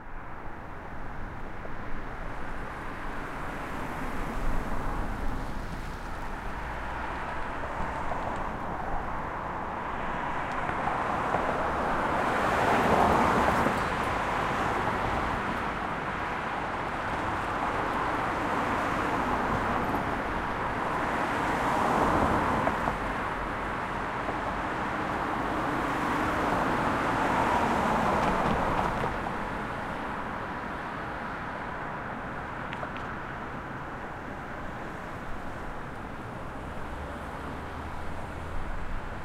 cars passing on a busy street (left to right)
Cars passing by on this intersection near my house. Hope this is useful to you, I'd love to see how you use it!